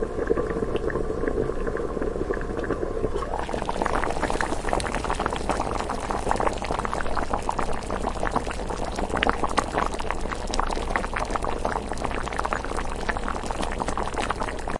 Boiling opening
boil, boiling, cook, cooking, food, gas, kitchen, open, opening